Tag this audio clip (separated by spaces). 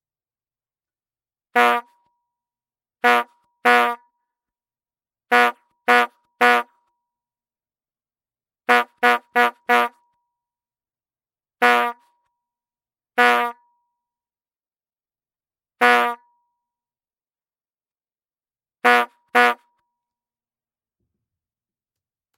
Bulb Horn Old Rubber Squeeze